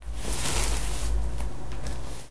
Deslizamiento de espada
a sword sliding on grass
sword, grass, slide